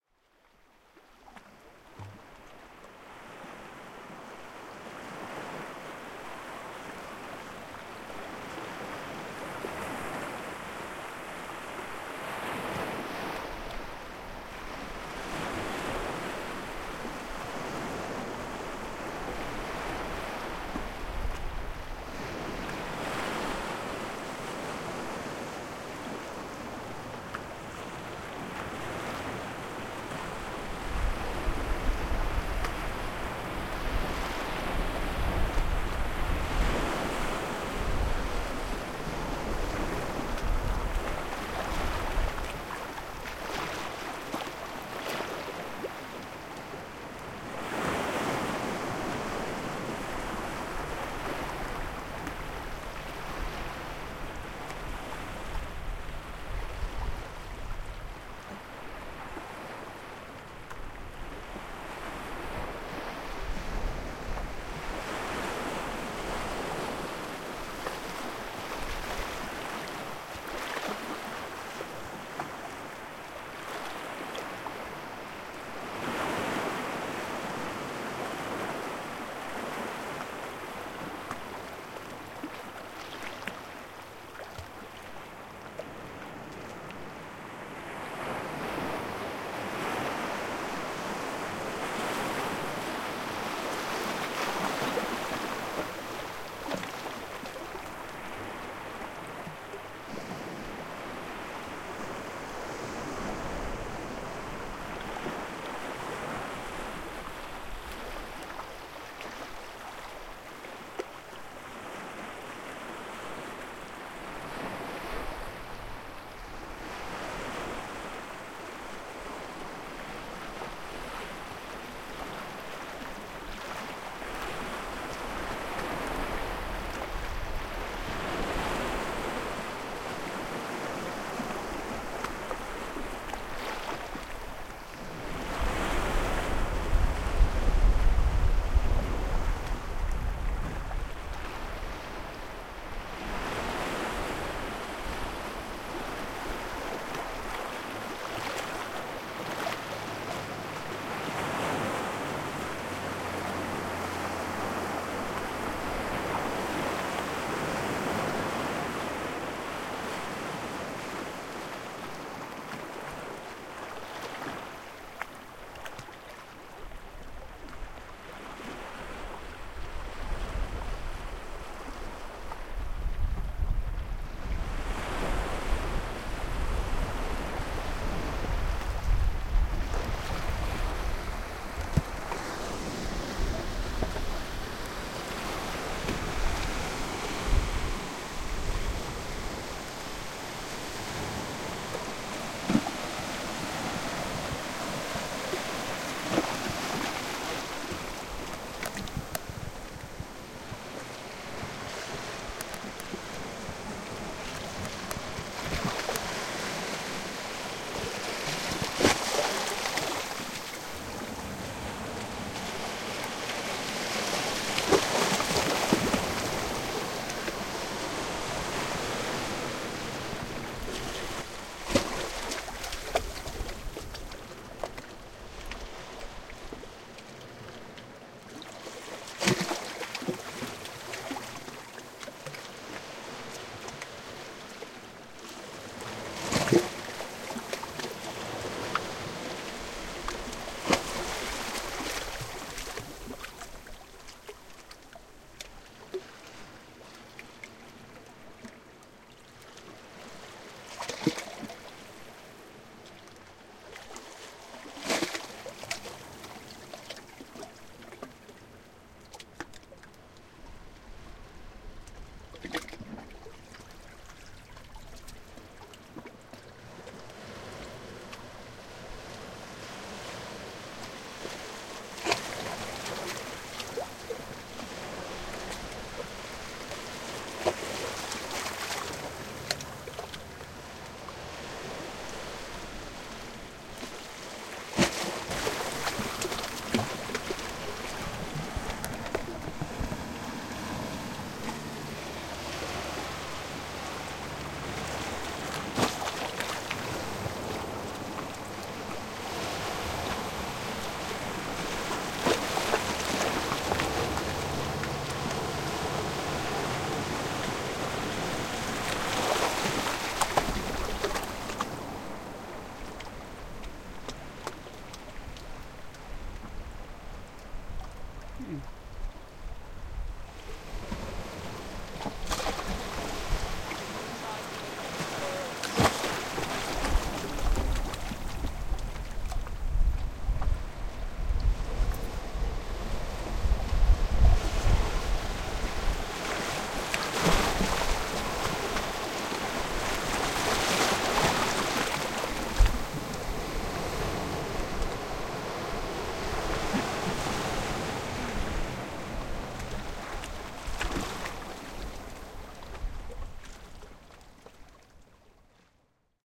Recorded in mid summer near Southwest Harbor on Mt. Desert Island off the Maine coast with a Marantz PMD661 and an Audio Technica BP4025 stereo mic. Small waves on a rocky beach.
Maine, ocean, waves, shore, surf, field-recording, sea, coast